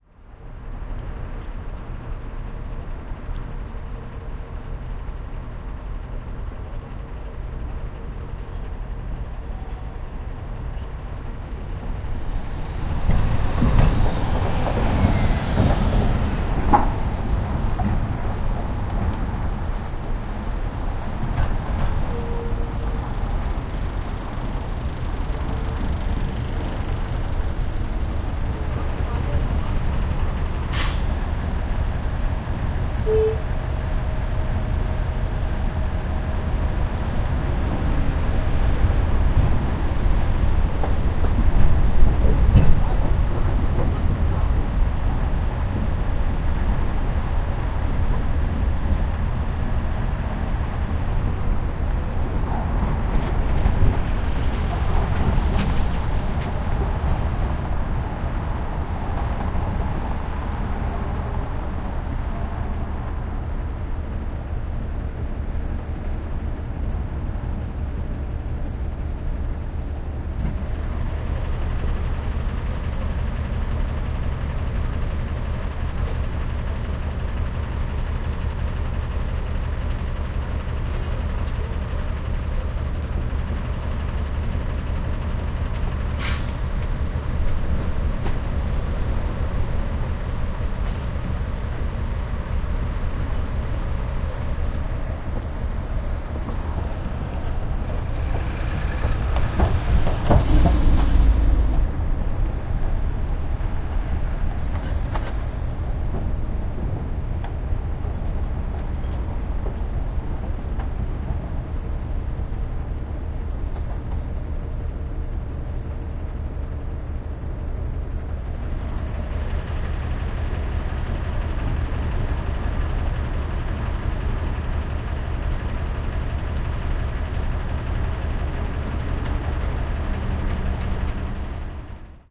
0039 Traffic and construction
Traffic in a construction area. Truck engine. Horn. Knocks from the traffic
20120116